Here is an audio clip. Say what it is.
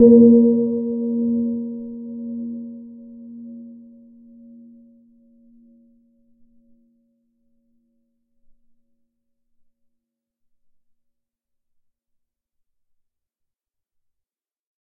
Gong (Vietnam, small) 01
Vietnamese gong about 30cm ⌀. Recorded with an Oktava MK-012-01.
Vietnam, metal, percussion, gong